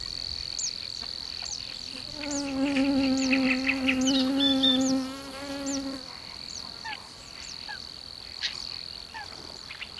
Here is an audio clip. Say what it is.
single mosquito buzzing, cricket and birds in background. Sennheiser MKH60 + MKH30 into Shure FP24 and Olympus LS10 recorder. Donana National Park, S Spain
spring, south-spain, field-recording, marshes